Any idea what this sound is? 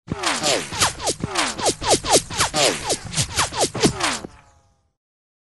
Bullet Fly Bys
fly, action, bys, scary, blood, war, bullet, shot, gun, fight